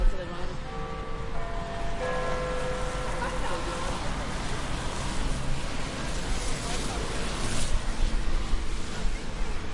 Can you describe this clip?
Big Ben (From Westminster Bridge)